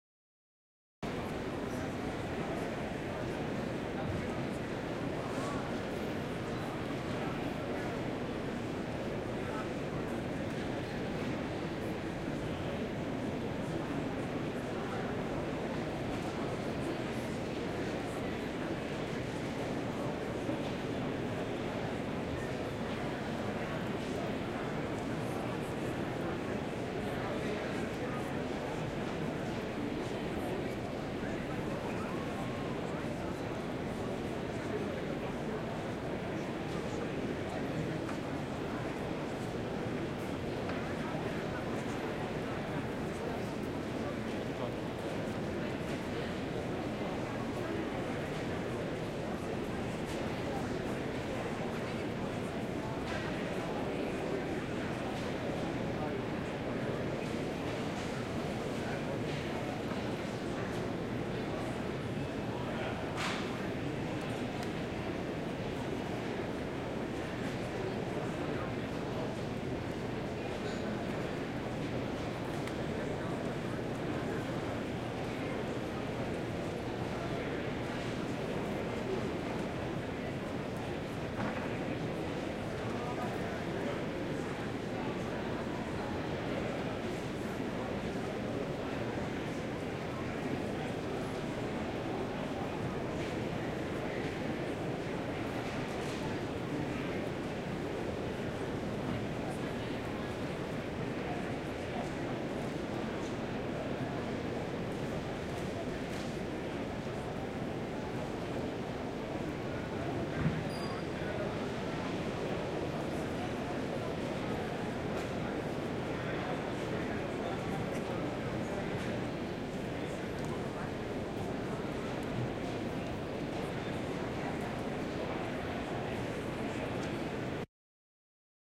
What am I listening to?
Crowd Large Large Venue Tradeshow
A lot of people wandering around a large 50,000 square foot trade show.
Ambience, busy, Crowd, tradeshow, very